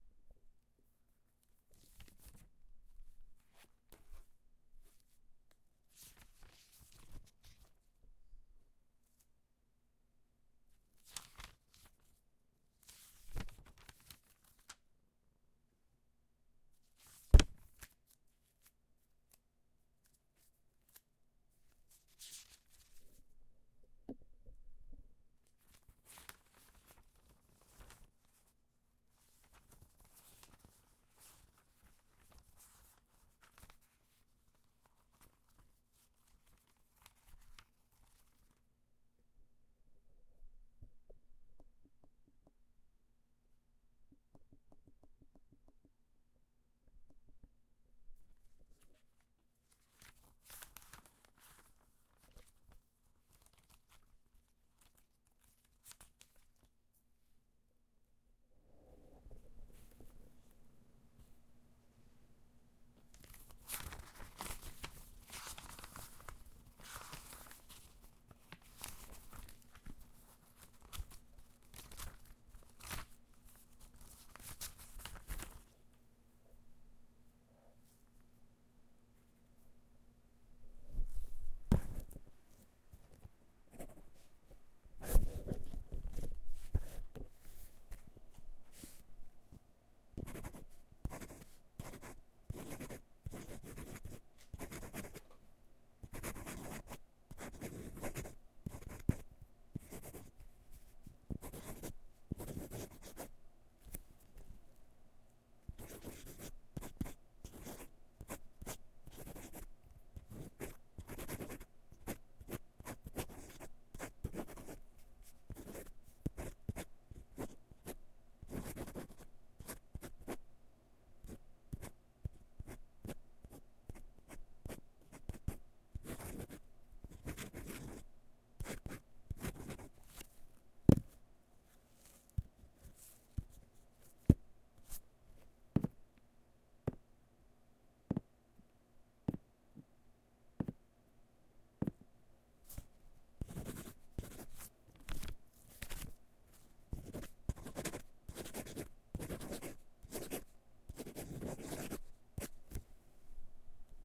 Pen Paper
scribbling on paper with a fountain pen, then flipping a few pages
fountain, ink, paper, pen, scribble, sign, signature, write, writing